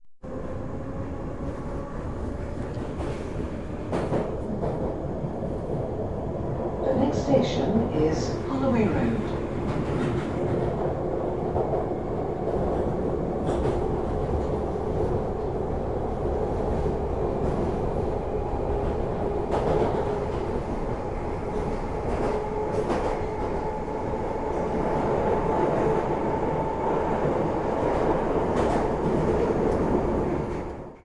London Underground
Recorded with iPod and Belkin Tunetalk Stereo.